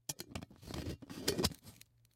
Longer twisting lid onto martini shaker